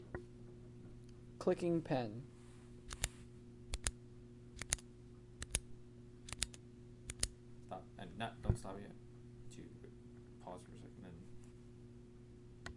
Recording of a person clicking a pen